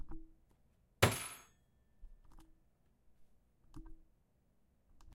Table Slam Open Fist